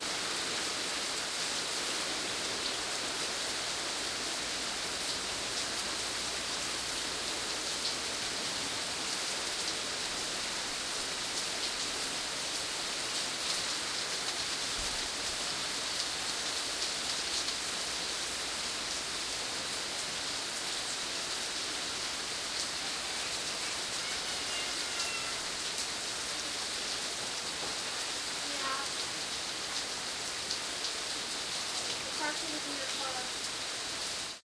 memorial rain more4startrek2
Memorial Day weekend rain and thunderstorm recordings made with DS-40 and edited in Wavosaur. I really want to leave now, please make it stop.
thunder, storm, rain, ambience